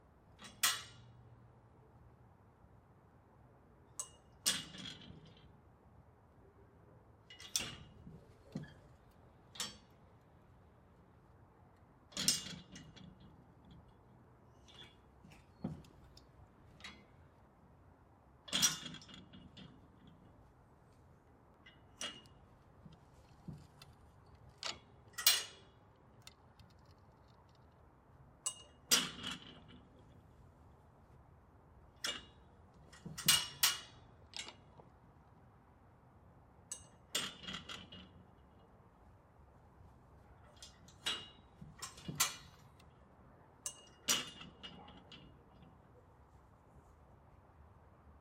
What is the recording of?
FOLEY Ext Gate Metal 001
This is a metal latch on a gate, which I'm opening, and it closes automatically with a spring. Nice latch sounds. Night exterior.
Recorded with: Sanken CS-1e, Fostex FR2Le
close, gate, hinge, latch, metal, open